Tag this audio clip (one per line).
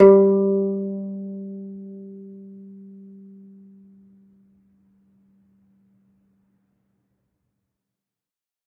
acoustic
flickr
guzheng
kayageum
kayagum
koto
pluck
string
zheng
zither